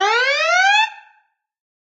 RedAlert Klaxon STTOS recreated
Recreation from scratch of the "red alert" sound used in the original series of Star Trek. Totally synthetic, created mathematically in Cool Edit Pro. Loopable.